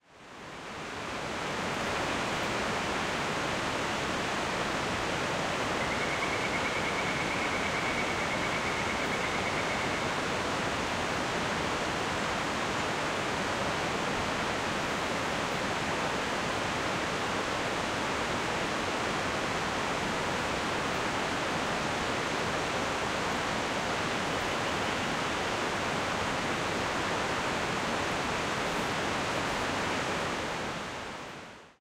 Distant Waterfall - From Lookout
Field recording from a lookout of a waterfall flowing in the distance.
Recorded at Springbrook National Park, Queensland using the Zoom H6 Mid-side module.
stream, creak, ambience, field-recording, flow, water, forest, river, nature, waterfall, distant, ambient